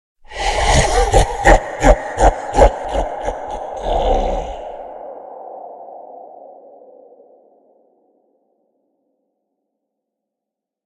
monster-laugh
A slowed-down recording of an evil, croaky laugh.
bad, cackle, comedy, evil, giant, horror, humor, laugh, laughter, monster, psycho, suspense, villain